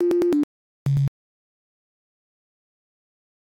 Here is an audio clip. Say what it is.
Quickly made in LMMS.